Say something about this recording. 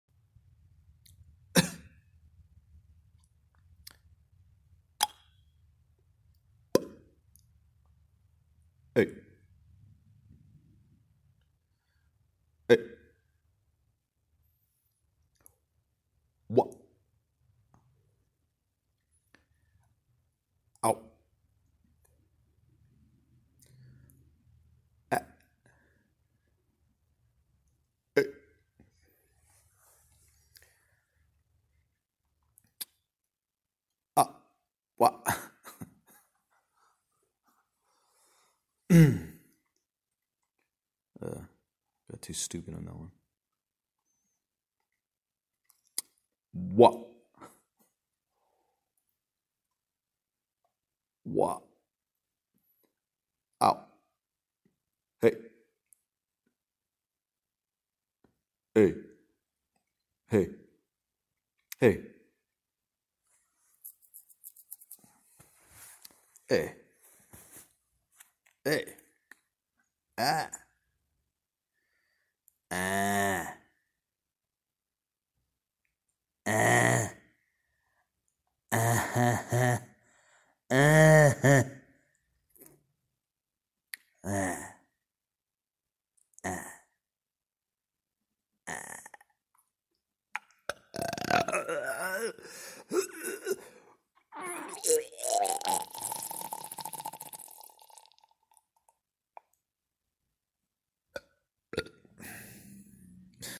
Just messing around & did some recordings in this empty room . Could use a lot of this stuff for game characters .